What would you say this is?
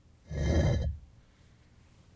Concrete blocks moved on top of one another. Sounds like a stone door moved. Use this sound to enter the secret chamber of your pyramid.
Recorded with AKG condenser microphone to M-Audio Delta AP soundcard